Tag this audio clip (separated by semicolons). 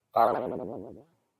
boing; comic; hat